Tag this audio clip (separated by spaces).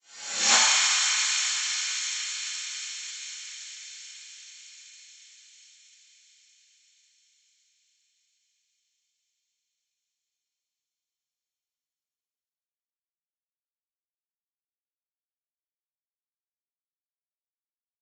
cymbal reverse